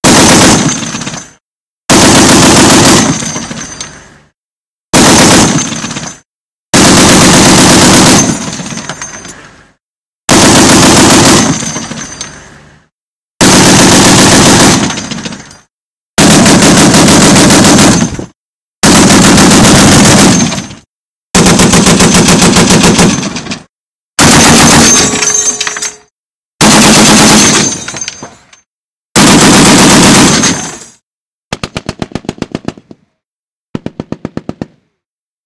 Various gunfire sounds of a vehicle-mounted 50 Cal. Machine Gun.